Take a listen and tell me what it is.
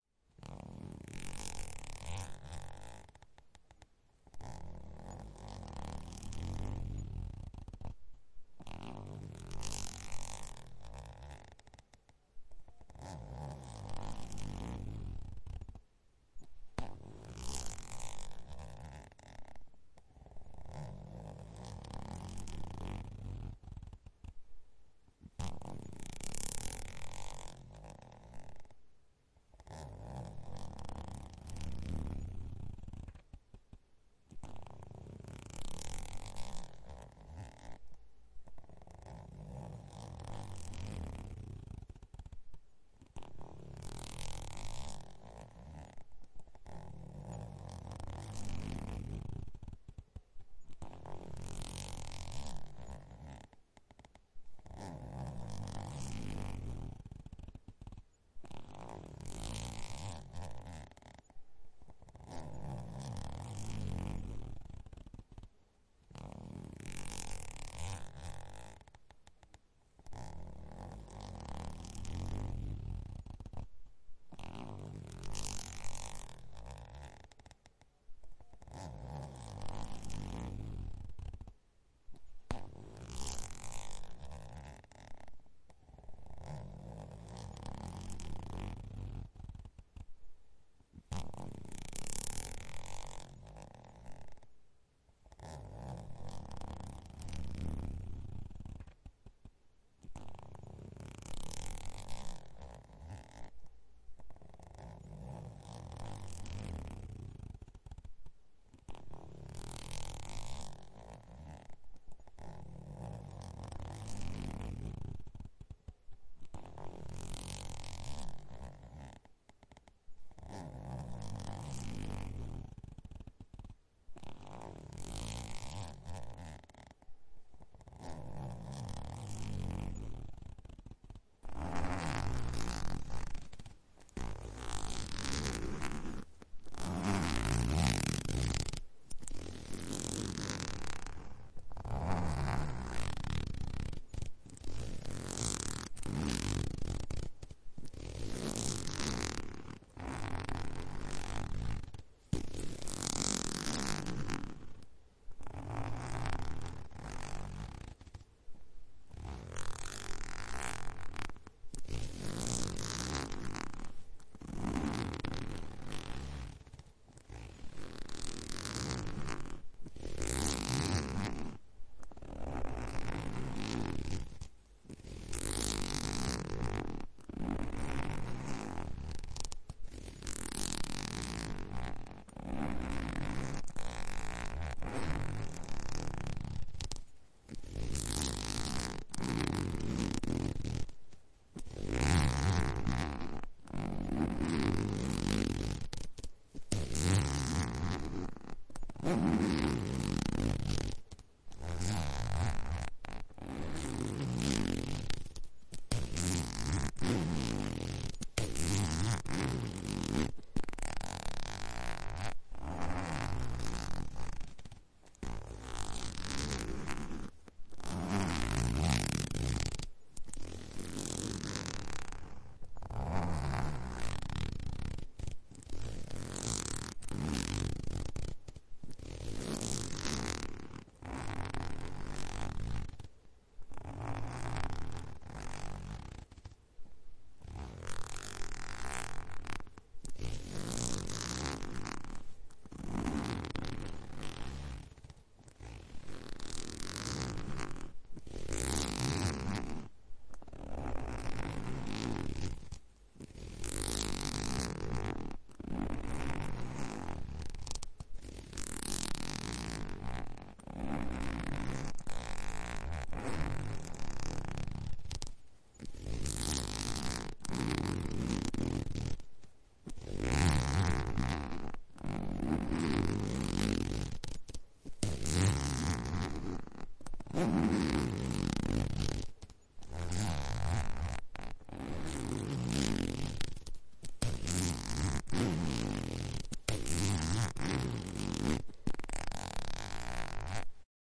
Zipper sound. Recorded with Behringer C4 and Focusrite Scarlett 2i2.
sound, unzip, unzipping, zip, zipper, zipping